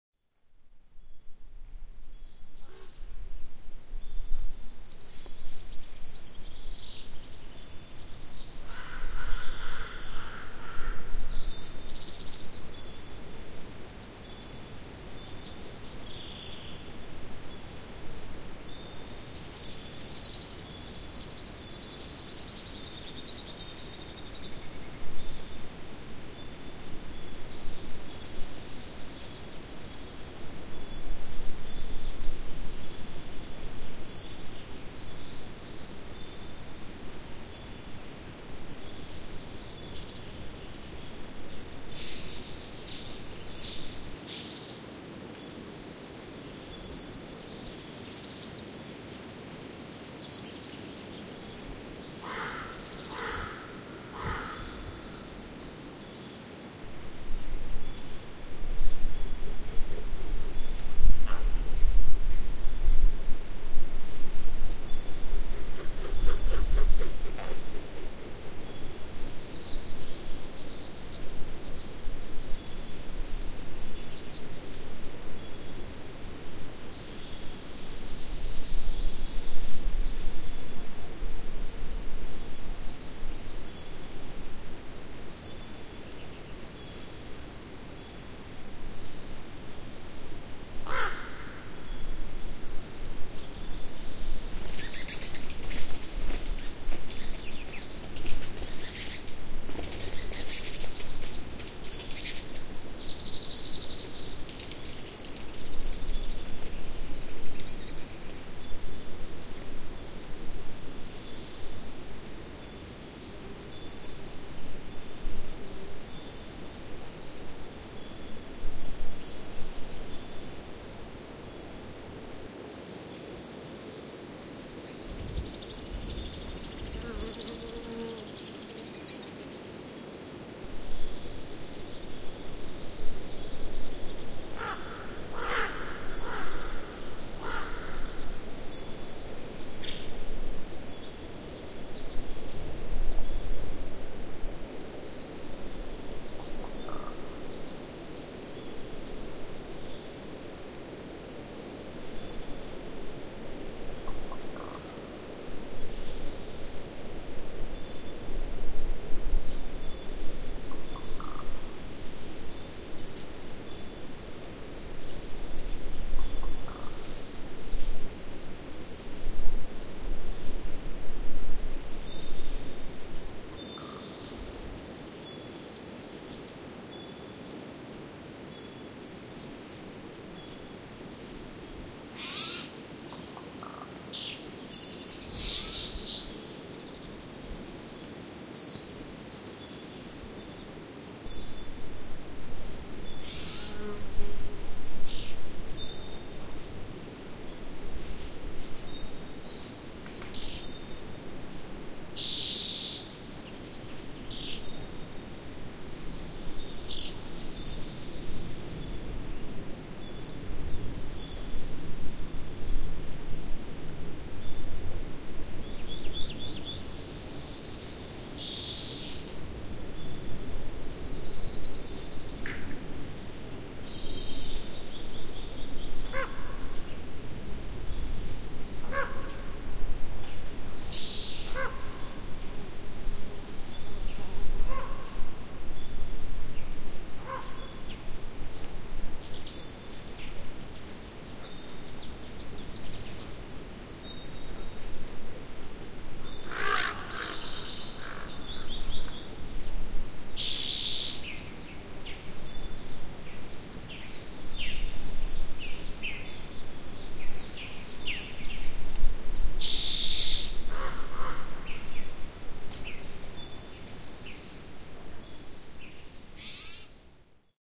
Morning in Pinnacles National Park with ravens and other wildlife, California, USA